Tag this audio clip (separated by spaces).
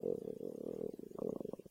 human tummy foley stomach stomach-grumble